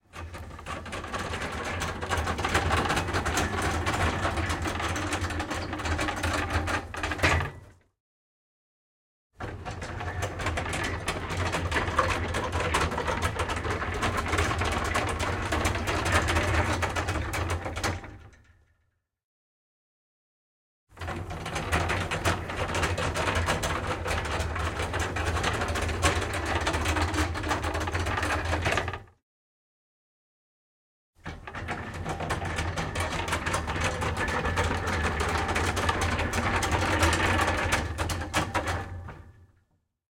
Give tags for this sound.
chain; close; cog; elevate; gate; mechanic; medieval; metal; old; open; wood

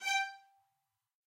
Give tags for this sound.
fsharp5; multisample; spiccato; violin; violin-section

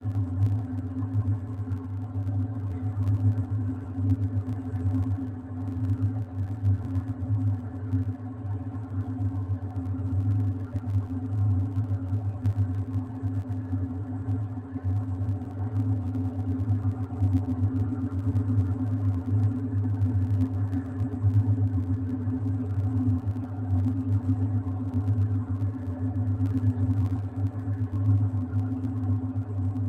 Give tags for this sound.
Room; Ambience; creepy

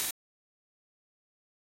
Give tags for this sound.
hat; hi

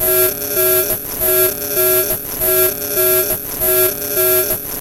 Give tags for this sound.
bending; glitch; bend; circuit; phone; toy

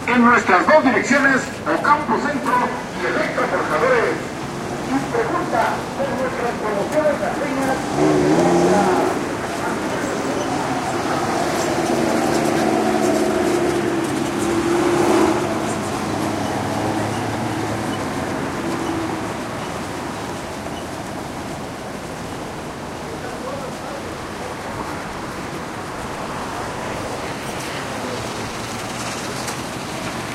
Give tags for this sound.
field-recording; traffic; ambiance

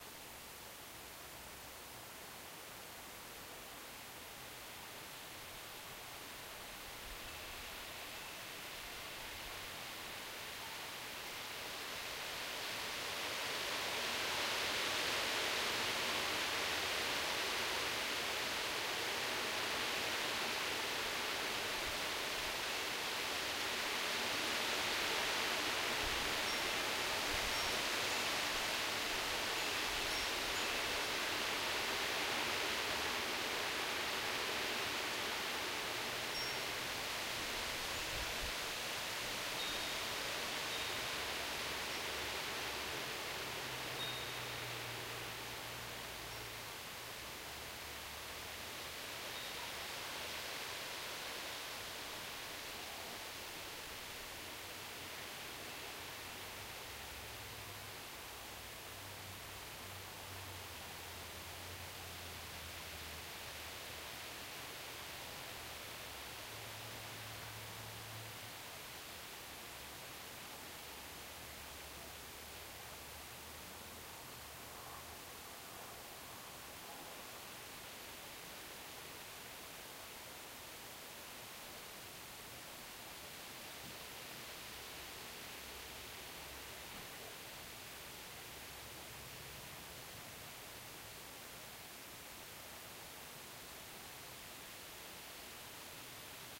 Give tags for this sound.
wind-chimes; field-recording; weather; thunder; mother-nature; rumble; storm; wind

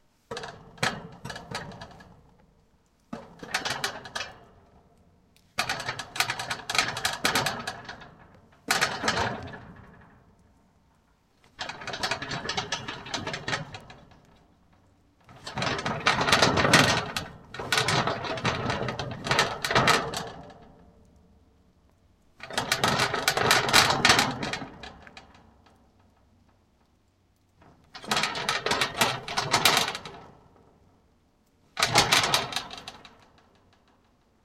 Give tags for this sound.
rattle; metal; clatter; fence; iron; gate

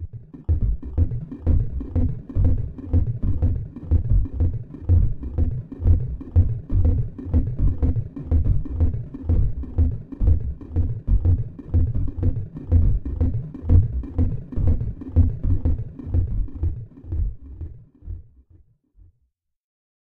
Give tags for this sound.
bubble; engine; pump; muted; pulsating; machine; waterpump; slow; deep; mechanical; pumping

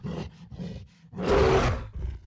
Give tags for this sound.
creature; growl; monster; roar

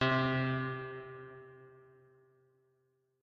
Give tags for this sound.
effect; Select; fnaf; Start; Digital; Camera; Beep

